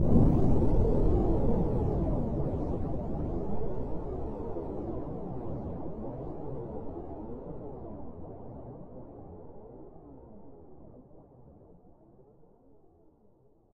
Torpedo launch underwater 2
This launch is a modified version of Torpedo launch underwater, it has some more phaser added to create the sound of the water sounds.
projectile, fire, explosion, torpedo, rocket, launch, missile, blast